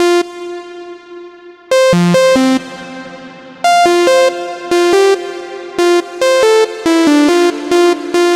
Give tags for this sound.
140
bpm
electro
house
loop
music
synth

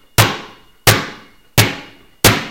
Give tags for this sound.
ball
bounce
Bouncing

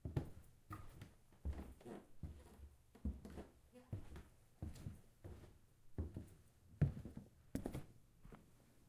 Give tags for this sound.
footsteps
wood

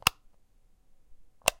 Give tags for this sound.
light
button

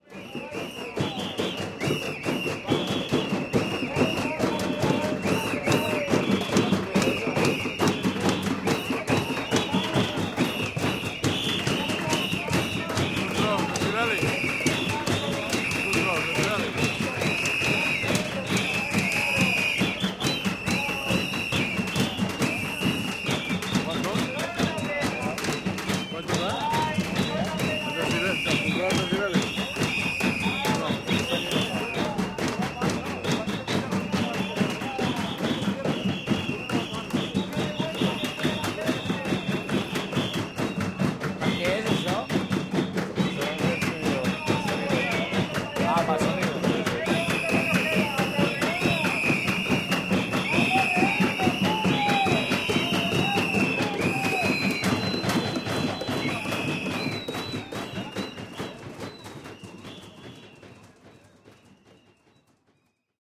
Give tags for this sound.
percussion
protest
street
traffic
voices
whistles

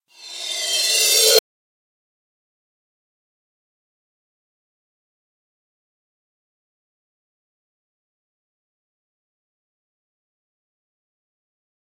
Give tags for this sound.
reverse
cymbals
fx
cymbal
metal
echo

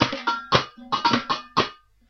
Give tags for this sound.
can
child
hit
loop
loopable
percussion
play
playing
rhythm

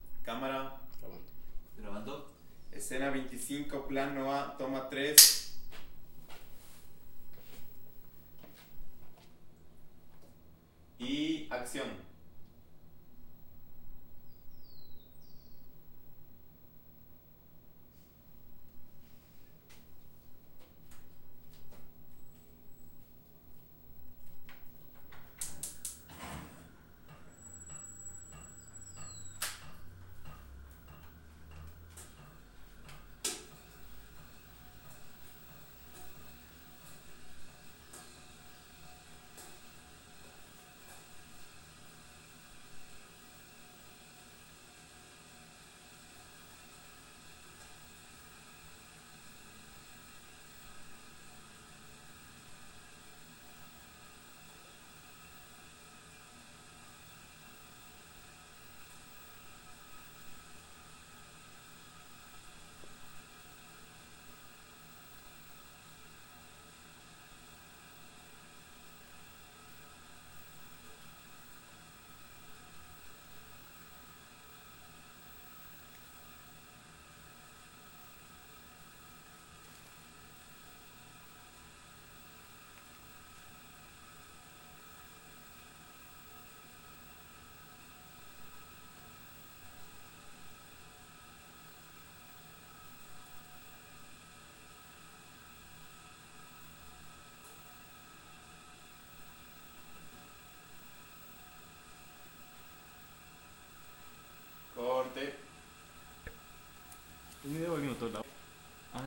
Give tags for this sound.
ambience
tv

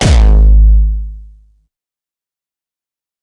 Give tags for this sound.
nu-style
kick
punch
fat
hardstyle
tok

